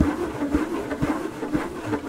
rubbing and scraping noise on a leather of a jdembe.
I'm interest about what you do with this sort of sound.
strange, leather, scraping, rubbing